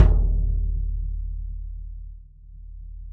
BD22x16-MLP-O~v03
A 1-shot sample taken of an unmuffled 22-inch diameter, 16-inch deep Remo Mastertouch bass drum, recorded with an internally mounted Equitek E100 close-mic and two Peavey electret condenser microphones in an XY pair. The drum was fitted with a Remo suede ambassador batter head and a Remo black logo front head with a 6-inch port. The instrument was played with a foot pedal-mounted nylon beater. The files are all 150,000 samples in length, and crossfade-looped with the loop range [100,000...149,999]. Just enable looping, set the sample player's sustain parameter to 0% and use the decay and/or release parameter to fade the cymbal out to taste.
Notes for samples in this pack:
Tuning:
LP = Low Pitch
MLP = Medium-Low Pitch
MP = Medium Pitch
MHP = Medium-High Pitch
HP = High Pitch
VHP = Very High Pitch
1-shot, multisample, velocity, drum